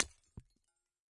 Small glass holiday ornament shattered with a ball-peen hammer. Bright, glassy shattering sound. Close miked with Rode NT-5s in X-Y configuration. Trimmed, DC removed, and normalized to -6 dB.